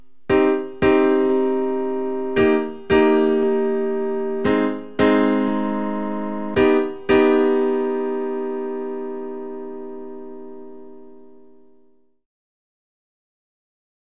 Piano Jazz Chords
piano, music